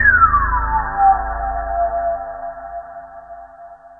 THE REAL VIRUS 10 - RESONANCE - G#0
High resonances with some nice extra frequencies appearing in the higher registers. All done on my Virus TI. Sequencing done within Cubase 5, audio editing within Wavelab 6.
multisample, resonance, lead